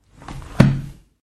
Closing a 64 years old book, hard covered and filled with a very thin kind of paper.
book, household, lofi, loop, noise, paper, percussive